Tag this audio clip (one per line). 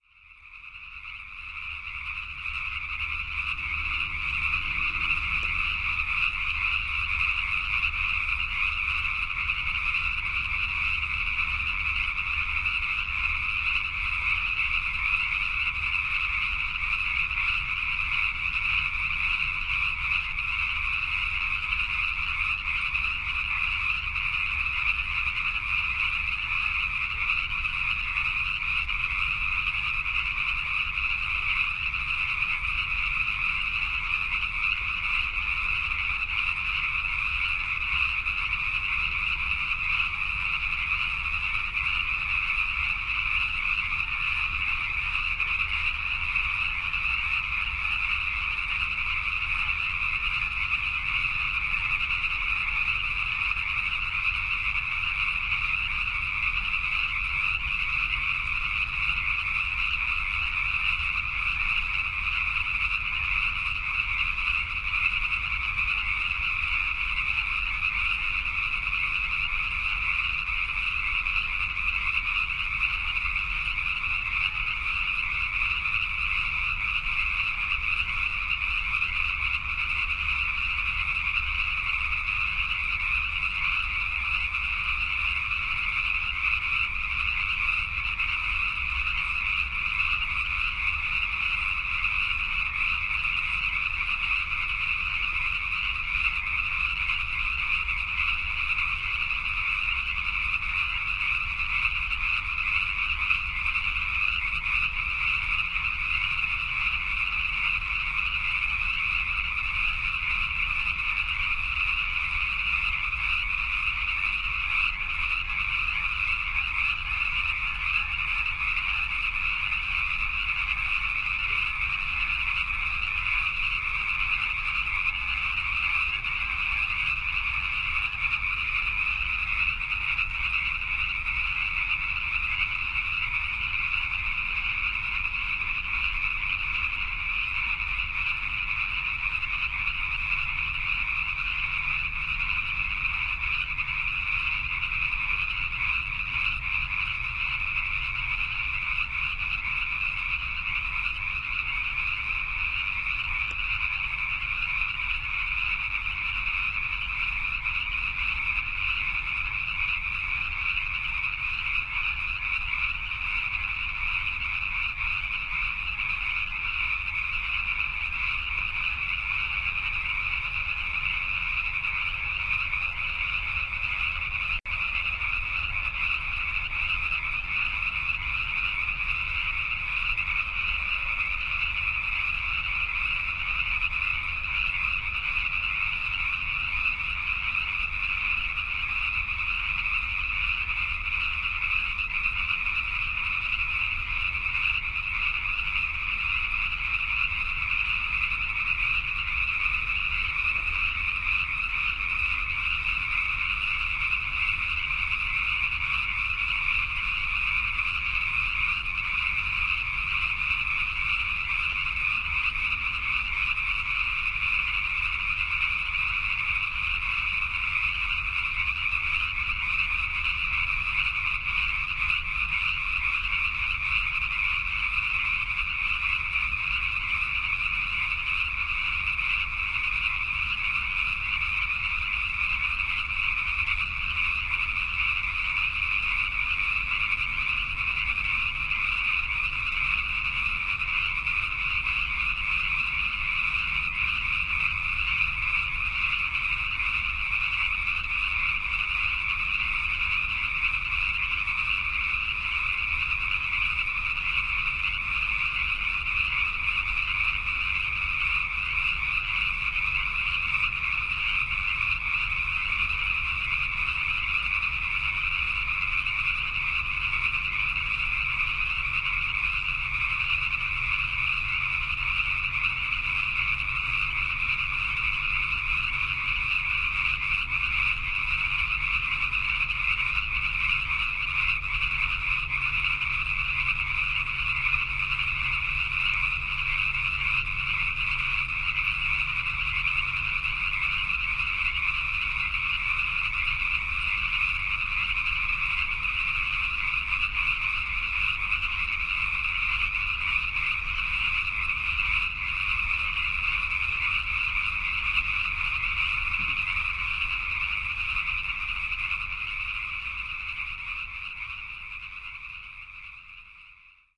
california
frogs
sherman-island